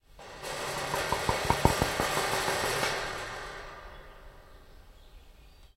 A short dragging of a stout stick across a kennel fence.